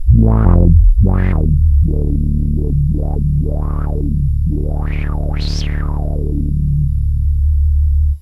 bassline1 langezogen
handplayed bass sounds on a korg polysix. last note on hold with modulised cutoff.
polysix, korg, bassline, synthe